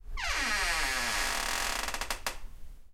Door Squeak, Normal, D
Raw audio of a dishwasher door squeaking open, sounding like a regular door.
An example of how you might credit is by putting this in the description/credits:
The sound was recorded using a "H1 Zoom recorder" on 19th May 2016.
Wooden, Door, Creak, Squeak, Normal